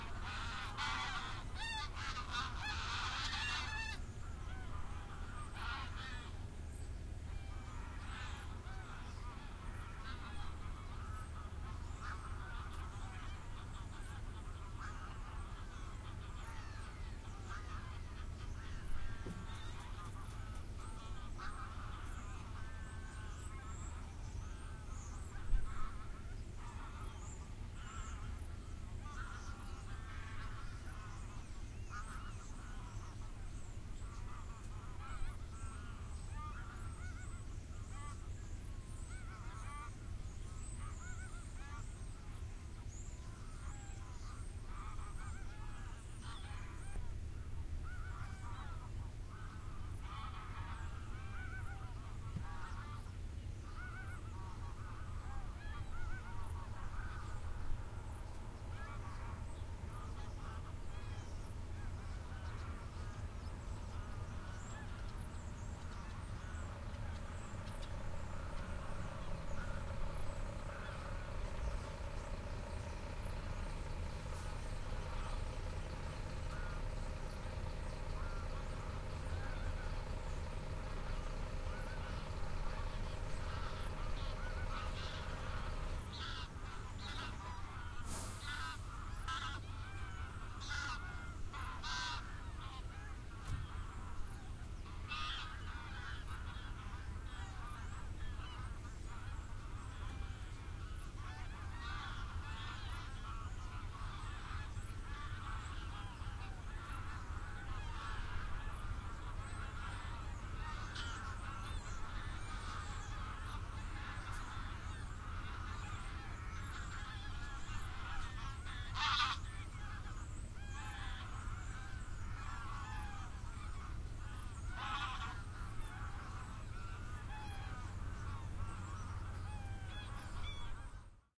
110801-wild geese on the elba
01.08.11: the second day of my research on truck drivers culture.standing in a queue to the Elba river ferry. Ambience from the truck cab - sounds made by geese living on the Elba river. Glusckstadt in Germany.
birds, gluskstadt, geese, field-recording, whirr, cars, germany, ambience